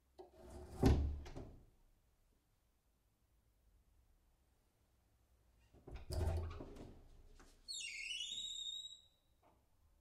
Creepy door
Door opening recorded with an AKG 414 through Apogee Duet.
door, spooky, opening, creepy, sinister, fear, terror